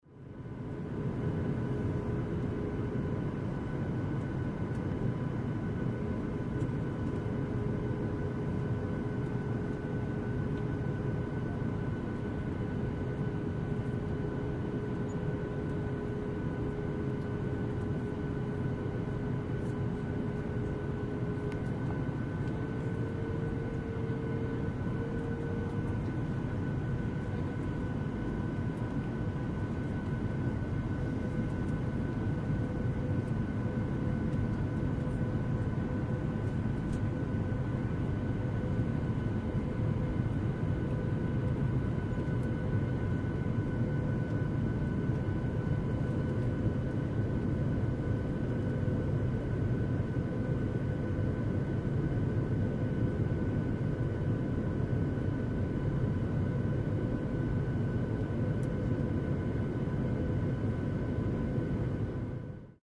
Inflight ambiance cabin noise. Recording chain - Edirol R-09 internal mics.